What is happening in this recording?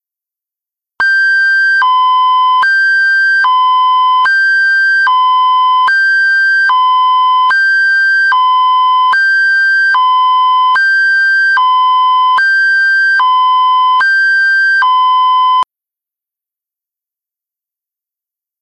Here's the last of the high-low sounds, and also the last siren sound. I like this high-low better than the first one.